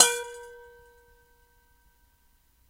WoodenHandleRimSM58-2ftAway
I struck the rim of a wire suspended 9 1/2-inch pressed steel commercial mixing bowl.
I struck the bowl's rim with a pair of 8-inch Channellock steel pliers.
bowl-rim, Shure-SM58, woodenHandle, Mic-2feet-away